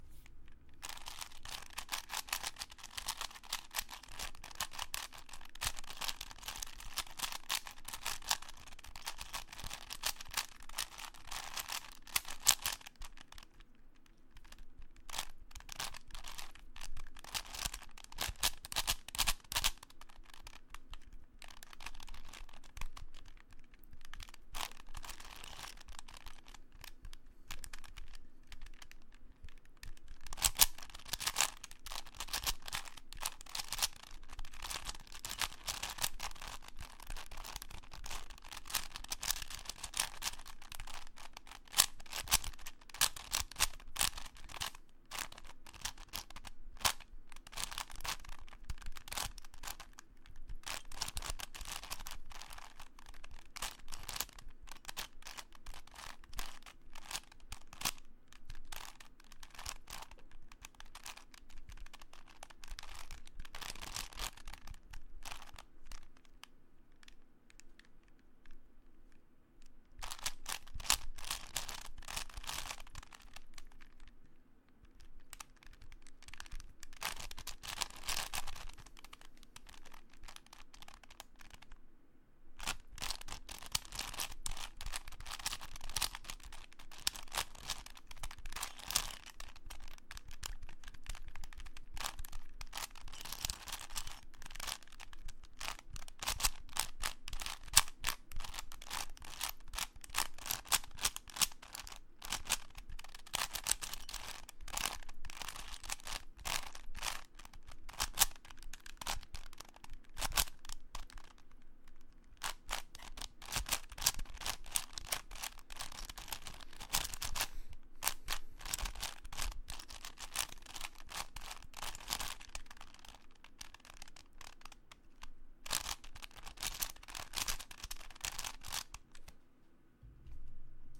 Me doing a quick solve of a 5x5x5 Cube
Cube, Puzzel, Rubikscube